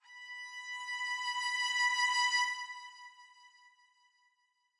Violin Rise B
These sounds are samples taken from our 'Music Based on Final Fantasy' album which will be released on 25th April 2017.
Rise, B, Sample, Violin, String, Music-Based-on-Final-Fantasy